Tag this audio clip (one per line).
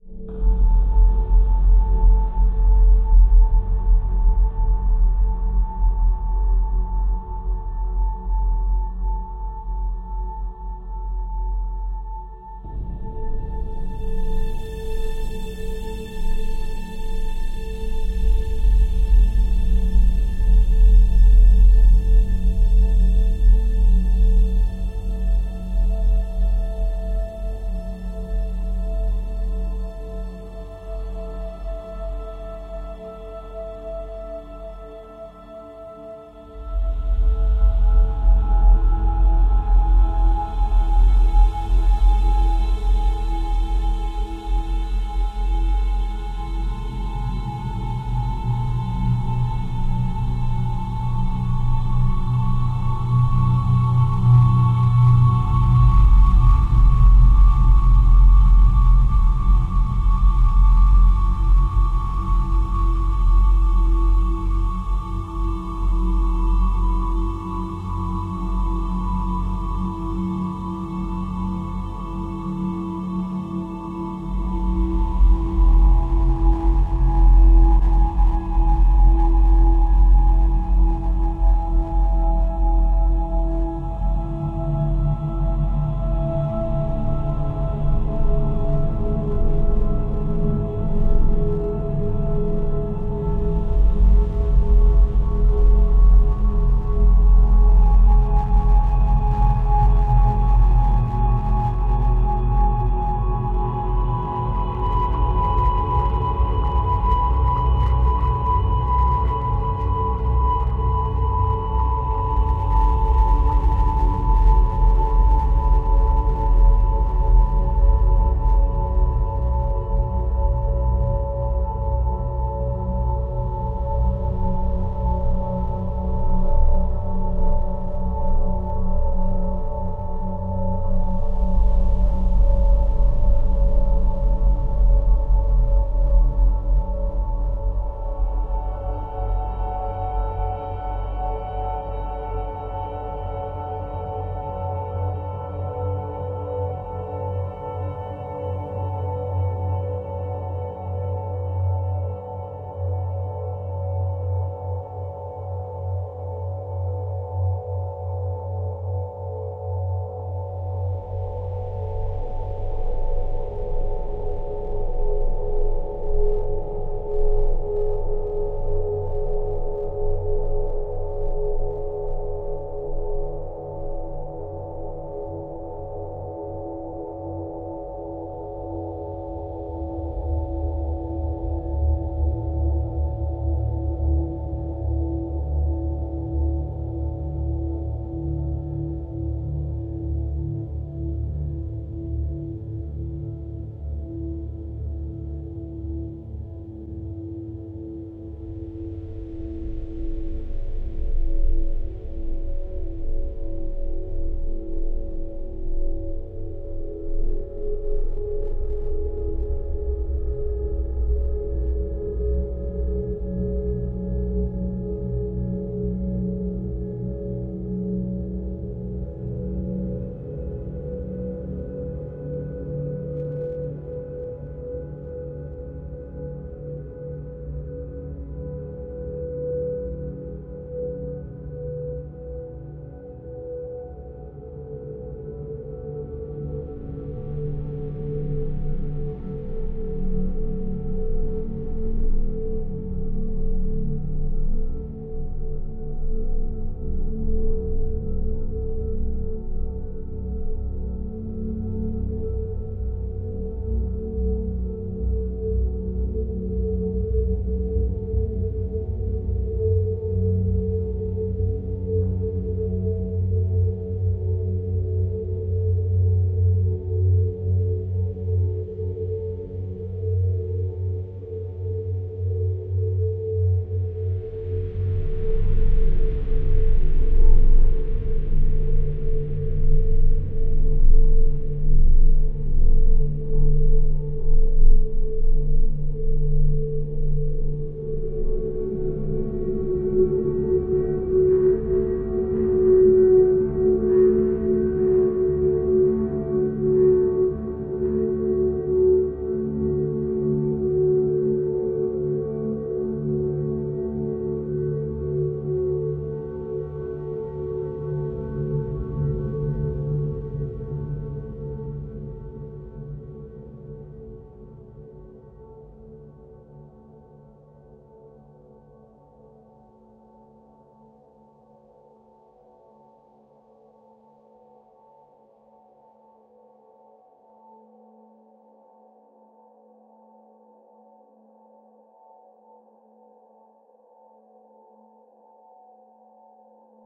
Ambiance
Ambient
Atmosphere
Cinematic
commercial
Drone
Drums
Loop
Looping
Piano
Sound-Design